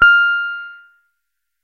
015-JEN Pianotone -F6
Jen Pianotone 600 was an Electronic Piano from the late 70s . VOX built a same-sounding instrument. Presets: Bass,Piano and Harpsichord. It had five octaves and no touch sensivity. I sampled the pianovoice.